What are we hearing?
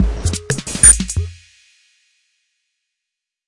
weird 5 loop180
A weird glitchy, bleepy loop, made on FL studio.